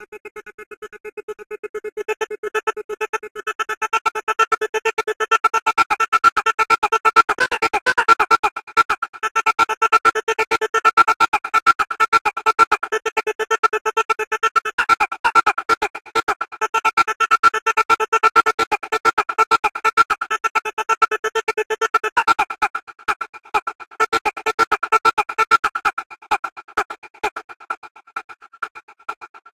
grito nortec
processed vocals: male yell with gate type effect.